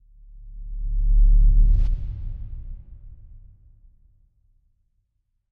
Sub bass riser
Subsonic bass riser suited for thrilling transitions. Made by editing and processing a kick drum.
Riser, buildup, Thriller, Bass, Transition, Subsonic